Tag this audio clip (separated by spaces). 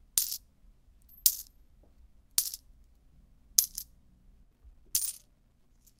coins change pile hit coin penny dime